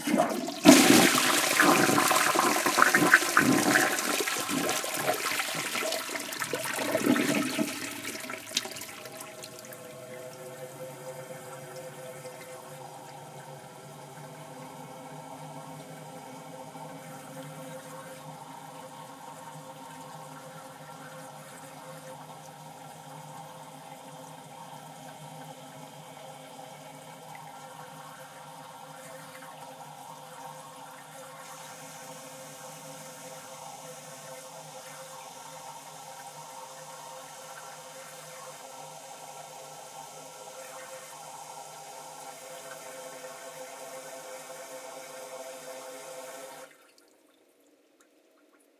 This toilet was recorded at a KOA camp ground in Winter Park, Florida, United States, in July 2009. I used a Zoom h4 and Audio Technica AT-822 single-point stereo microphone.